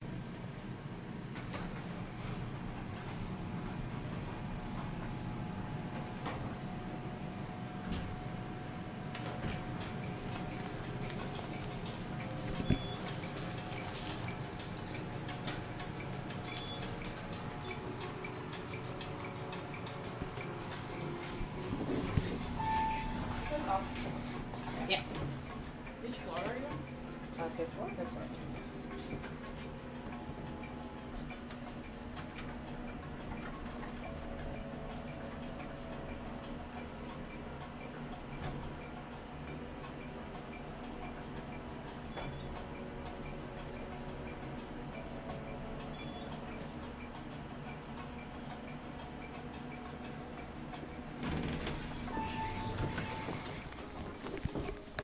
elevator music played in an elevator